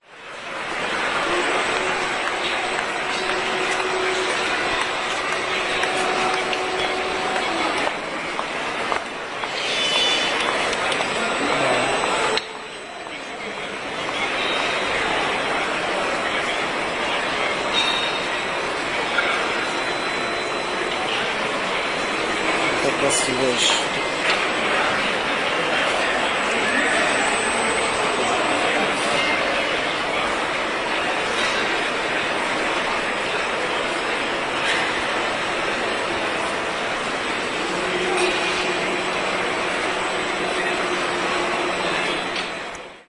07.11.09: between 13.00 and 15.00, the KAMIEŃ - STONE 2009 Stone Industry Fair(from 4th to 7th November) in Poznań/Poland. Eastern Hall in MTP on Głogowska street; the sound of the masonry saw.